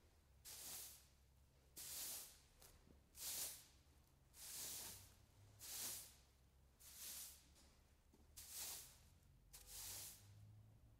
Broom sweeps 1
Broom sweeps recorded with an AKG 414 through Apogee Duet.
sweep, good, sweeping